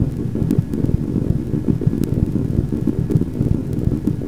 helicopter blade
A helicopter spinning its blade. This is actually just a small rope being spun modified to be deeper and louder.
helicopter, spin, whir